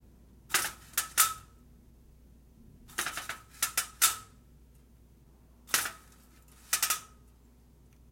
Venetian Blinds
Foley recording of messing around with metal/aluminum window blinds
venetian-blinds, foley, window, bedroom, blinds, window-blinds